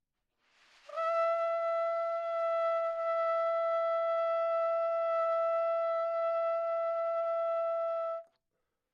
overall quality of single note - trumpet - E5
Part of the Good-sounds dataset of monophonic instrumental sounds.
instrument::trumpet
note::E
octave::5
midi note::64
tuning reference::440
good-sounds-id::1437
multisample, single-note, trumpet, good-sounds, neumann-U87, E5